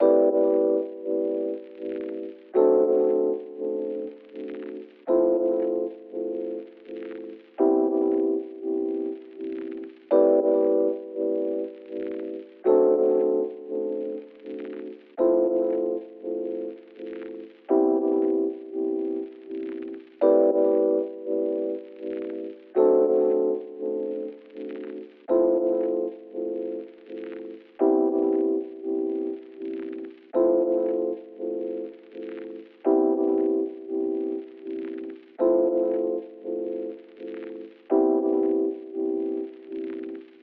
Lofi Vinyl E-Piano Loop 95 BPM
Epiano, lo-fi, pack, sample